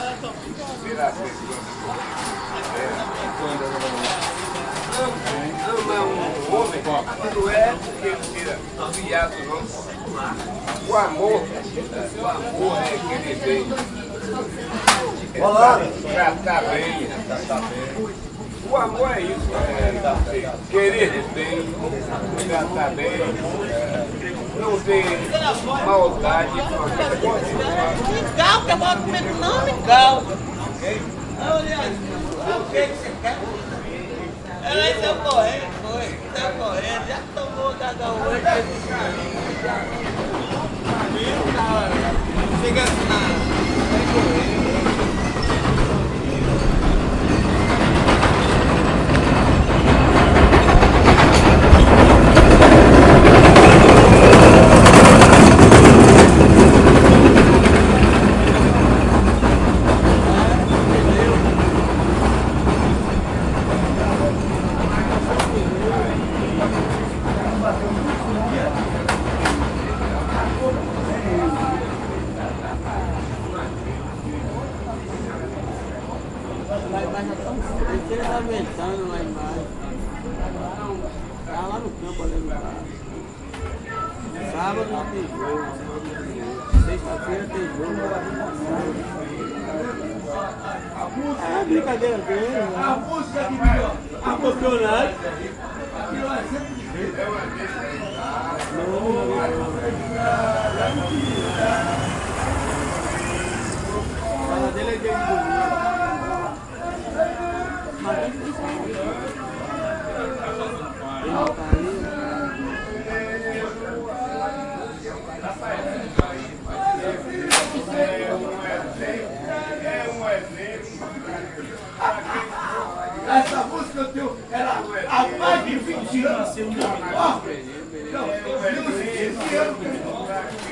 Diversão Noturna - Nightlife
Homens na rua próximo ao bar conversando/cantando, moradores conversando e barulho de veículos.
Gravado por Állan Maia
Equipamento: Tascam DR 40
Data: 24/Mar/2015
Hora: 20h
Men on the street talking / singing near a bar, locals talking and vehicle noise.
Recorded by Állan Maia
Equipment: Tascam DR 40
Date: Mar/24/2015
Time: 8 p.m.